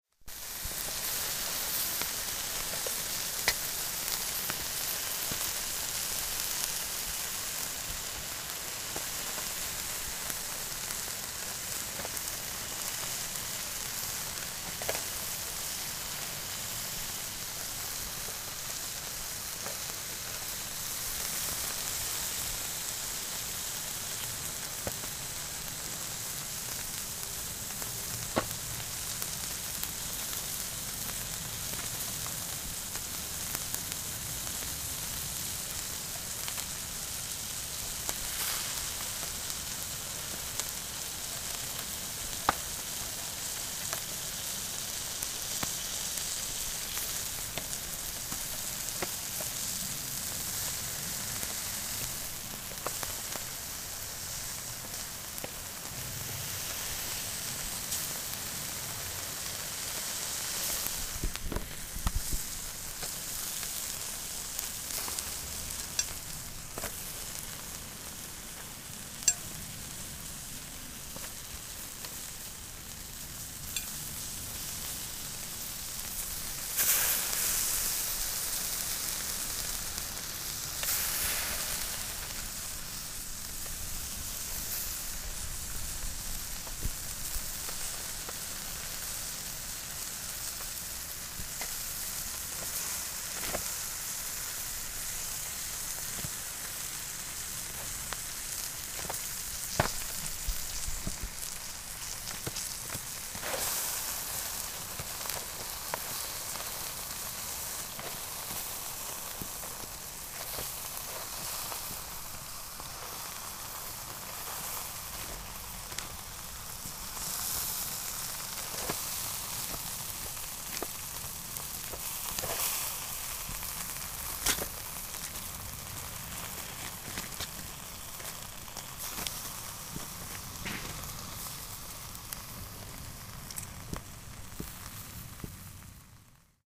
Summer Barbecue
Fish and lamb kofte cooking on a disposable barbecue set.
grill, sizzling, sizzle, food, cooking, frying, cook, barbecue, bbq